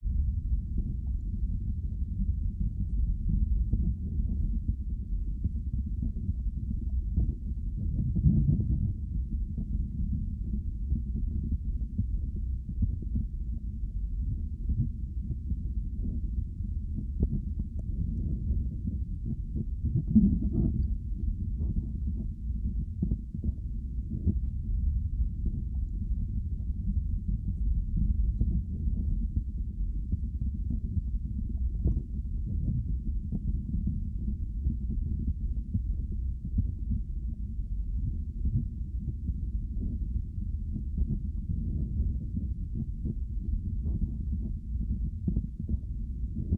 internal body sounds
Internal body-sounds. Recorded using small omni mics in a (closed) mouth.
EM172 ->ULN-2
body-sounds, internal, mouth